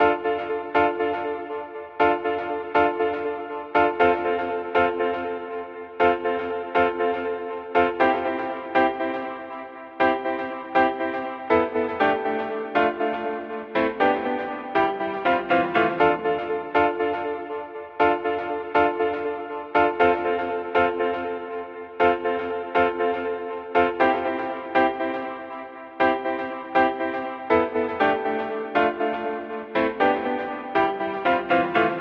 Piano loop 120
sampler, piano